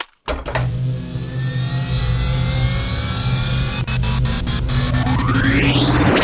Dark Energy build up
A large energy collector that builds up a "dark matter" then fires the weapon
alien, build, cannon, dark, energy, fight, space, up, war